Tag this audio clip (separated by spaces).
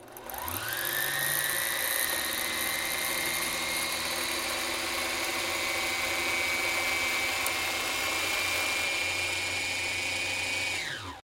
bobbin drone machine motor request sewing thread